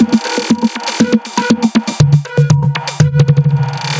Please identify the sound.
This is a variation of 20140306_attackloop_120BPM_4/4_23rd_century_loop1 and is a loop created with the Waldorf Attack VST Drum Synth. The kit used was 23rd century Kit and the loop was created using Cubase 7.5. The following plugins were used to process the signal: AnarchRhythms, StepFilter, Guitar Rig 5 and iZotome Ozone 5. The different variants gradually change to more an more deep frequencies. 8 variations are labelled form a till h. Everything is at 120 bpm and measure 4/4. Enjoy!